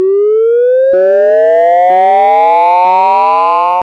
FUZET Benjamin 2014 2015 Spacealarm
Made using Audacity only
1. Generate a Chirp. Waveform: Sine. Frequency: 280 to 840 hZ. Amplitude: 0,3 to 0,8. Interpolation Linear. During 4 seconds.
2. Effect: Cross Fade Out
3. Effect: Echo. Delay times: 1. Delay factor: 0,5.
4. Effect: Change Tempo: -20% and Change Speed: +30%
5. Effect: Leveler: Heavy & -80dB
Typologie de Schaeffer: N'' Itération Tonique
Morphologie
Masse: Groupe Tonique
Timbre harmonique: Moyennement brillant, spatial
Grain: Grain de résonance
Allure: Mécanique
Dynamique: Attaque progressive
Profil mélodique: Variations scalaires
Profil de masse:
Site: 4 strates qui montent les unes après les autres
Alarm, Lyon, Univ